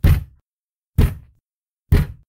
thump thud slam fist heavy
slamming fist into desk with reverb added
hit, impact, thud, thump, thunk, wood